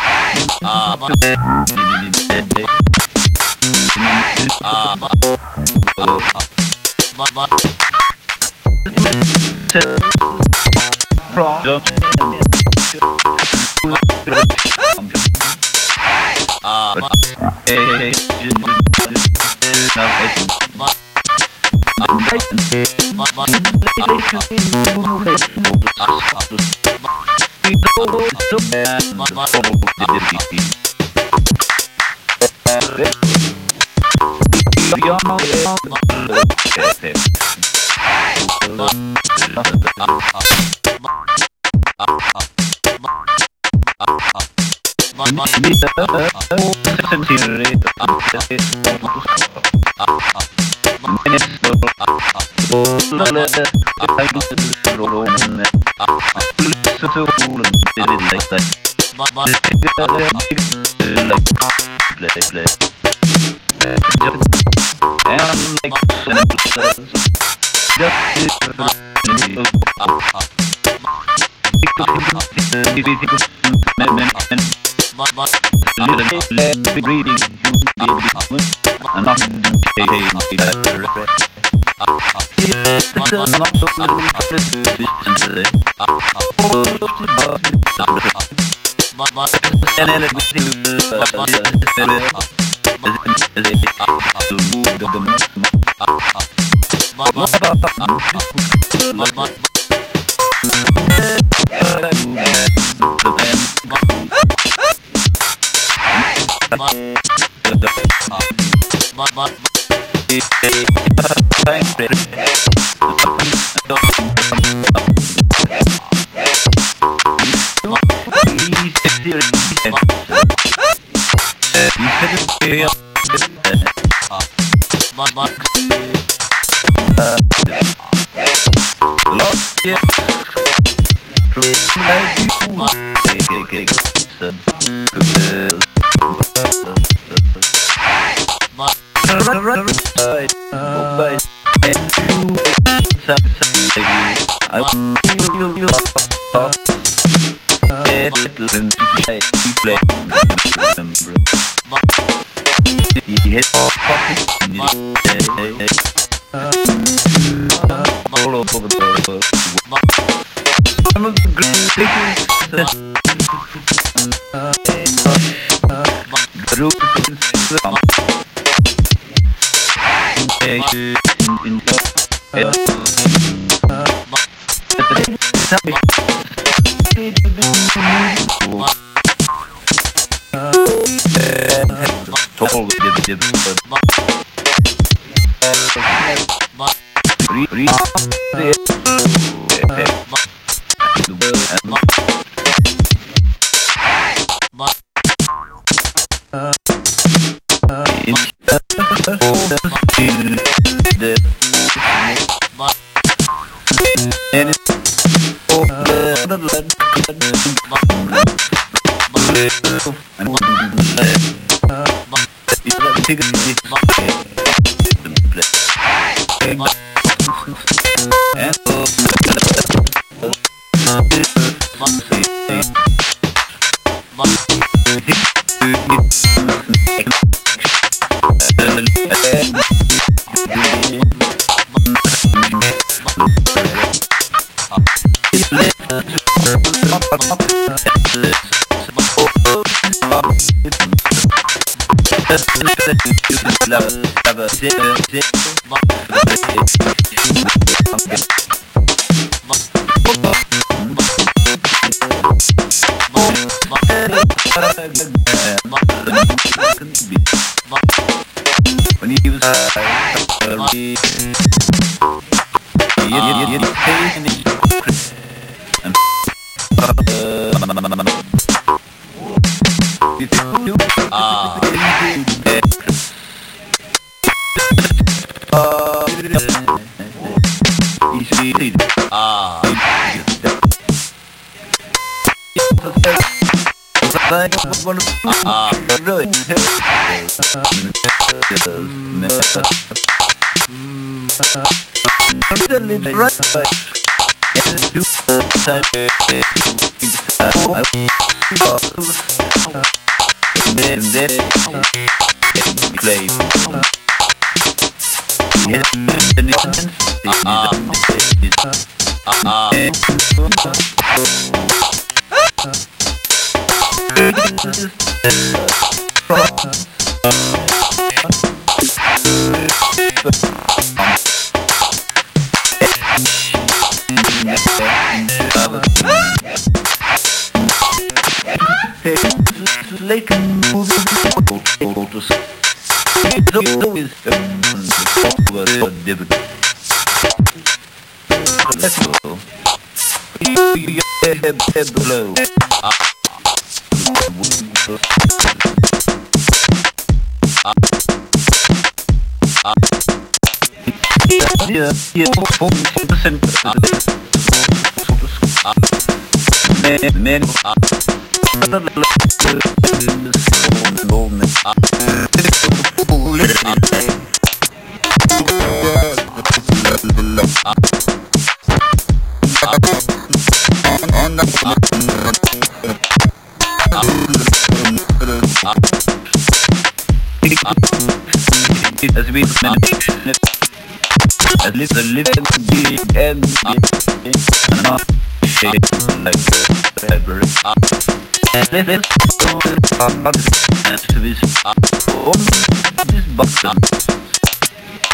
Otter Drummer
An old recording made with a tool I developed in Max/MSP called "Smooth Otter"